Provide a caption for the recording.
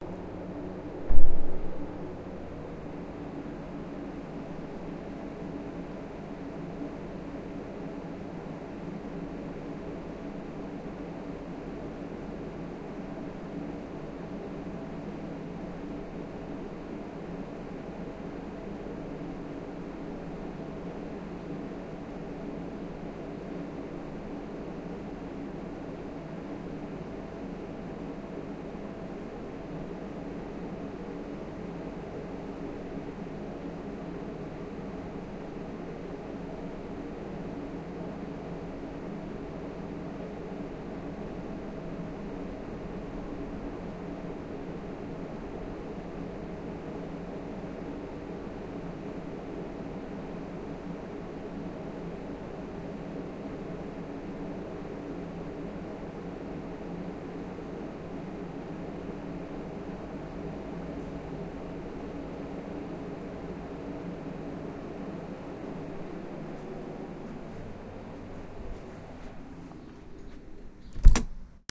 cold storage room: entering and leaving (closing the door)